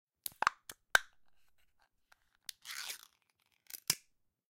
can open
opening a can of cat food
opened, can, food, cat, open, dog